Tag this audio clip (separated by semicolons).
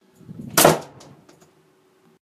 close; closing; door; field-recording; shut; slam; slamming; wood; wood-door; wooden; wooden-door